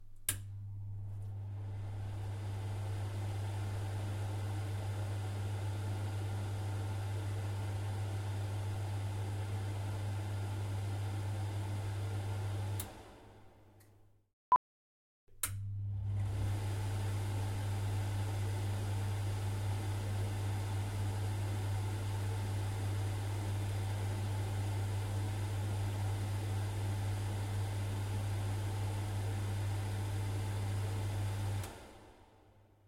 Extractor Fan
field-recording, stereo